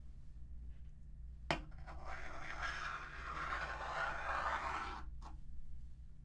Wooden wheel going around
ambient, atmosphere, clock, drawer, glassyar, metal, metalwheel, noise, sand, sandclock, stuff, stuffindrawer, wheel, wood, wooddrawer, yar